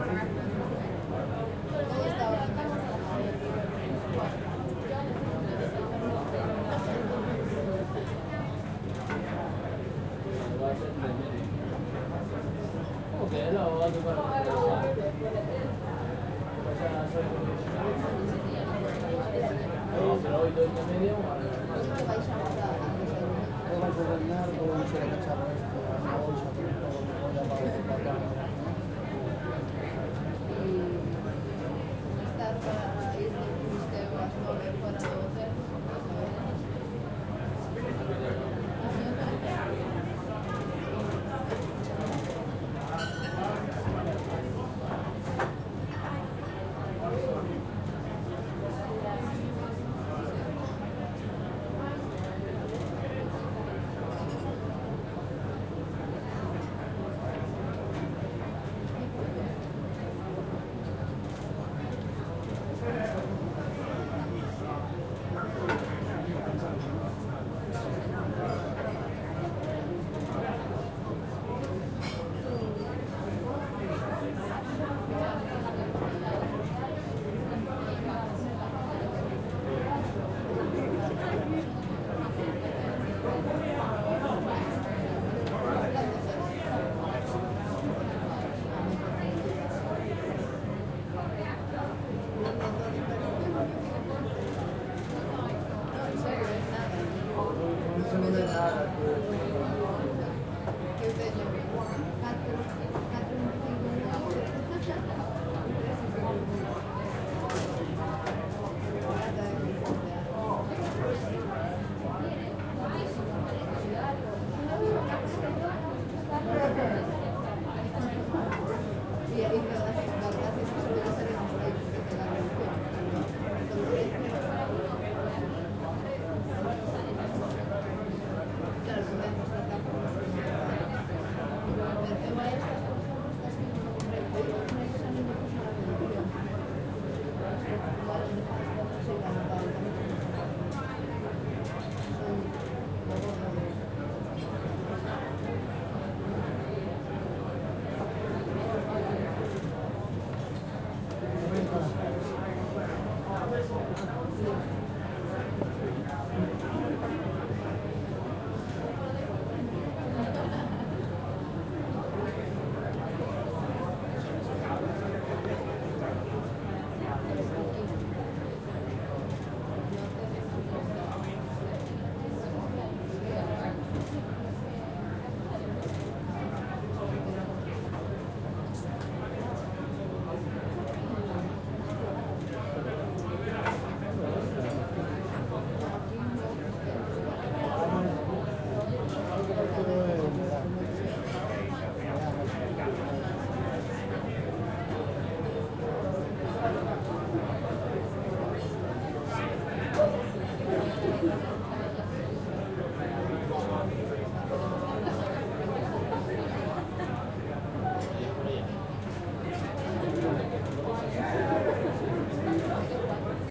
130215 - AMB INT - Peckham Pub
Recording made on 15th feb 2013, with Zoom H4n X/y 120º integrated mics.
Hi-pass filtered @ 80Hz. No more processing
Interior of a Pub on Peckham
pub, ambience, crowd, london